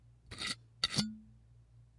Cap Turn Off Bottle FF270
2 quick cap turns followed by a quick pop release, high pitched. Glass.